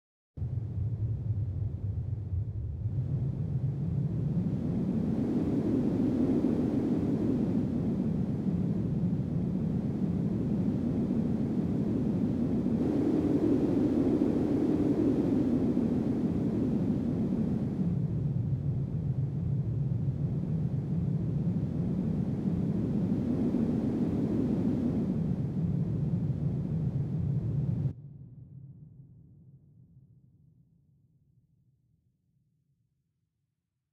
wind synth high altitude drone bird flying
drone, synth, wind, altitude, high, flying, bird